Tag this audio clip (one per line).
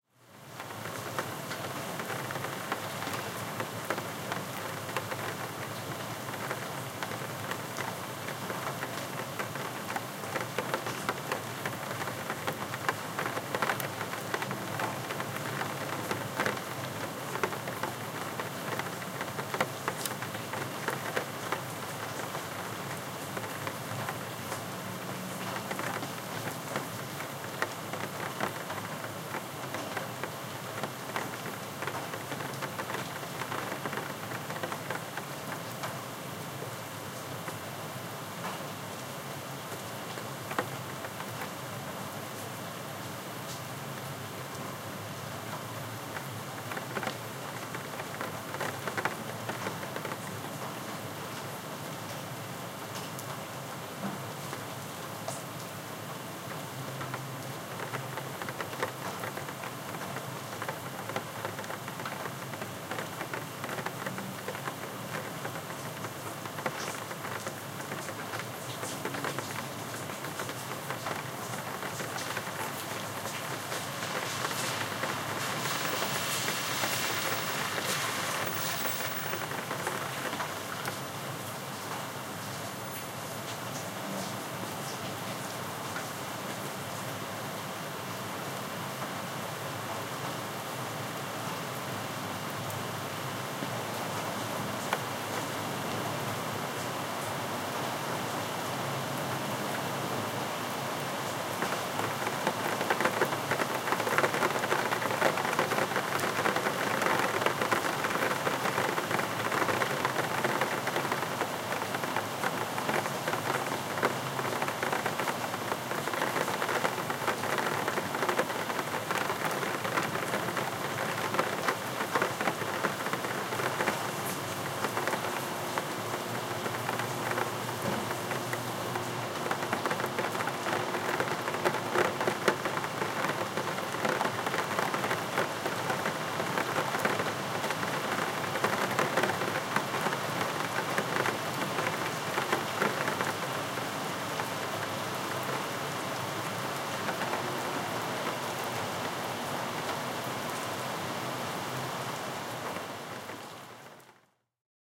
ambiance
ambient
calm
field-recording
gentle
night
NYC
quiet
Rain
relaxing
weather